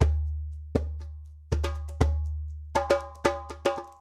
Djembe Loop 05 - 120 BPM
A djembe loop recorded with the sm57 microphone.
africa, ancident, djembe, drum, groove, percussion, remo, trance, tribal, tribe